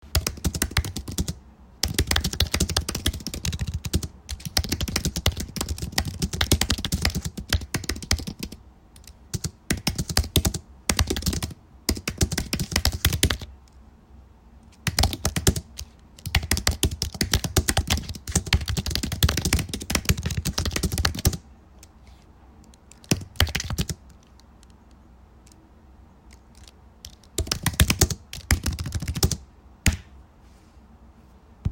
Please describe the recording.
The processing of typing an idea...
computer, keyboard, typing